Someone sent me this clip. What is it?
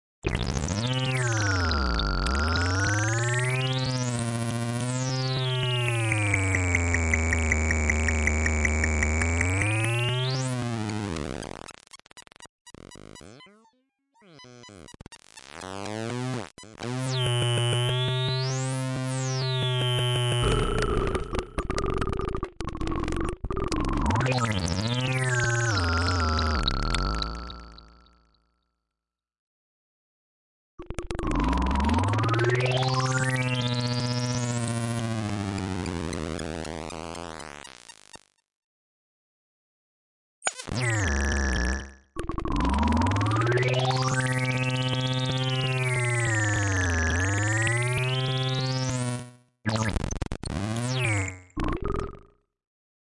Synplant fx 2
This sounds was made simply messing around with the Synplant plugin.
fx, vst